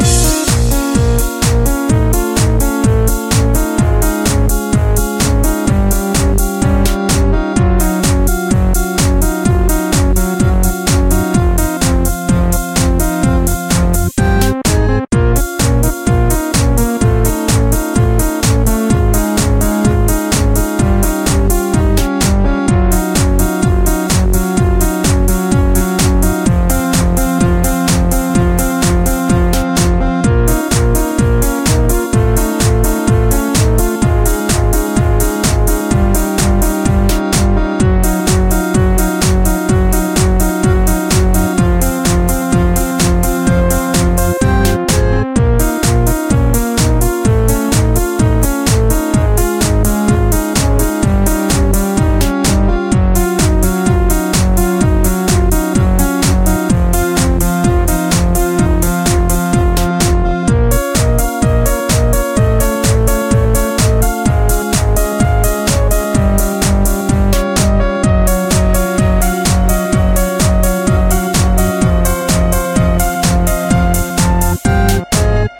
Loop Computer Feeling Good 06
A music loop to be used in fast paced games with tons of action for creating an adrenaline rush and somewhat adaptive musical experience.
battle, game, gamedev, gamedeveloping, games, gaming, indiedev, indiegamedev, loop, music, music-loop, victory, videogame, Video-Game, videogames, war